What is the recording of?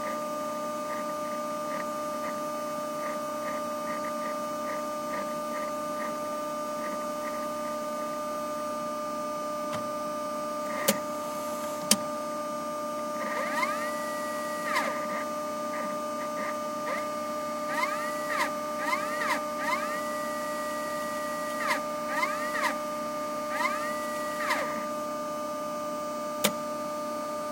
0108 DVW500 int jog-shuttle-stop
DVW500 jogging, shuttling tape, then stopping.
This sample is part of a set featuring the interior of a Sony DVW500 digital video tape recorder with a tape loaded and performing various playback operations.
Recorded with a pair of Soundman OKMII mics inserted into the unit via the cassette-slot.
sony, digital, technology, video, eject, electric, field-recording, vtr, dvw500, jog, cue, shuttle, mechanical, recorder, machine, player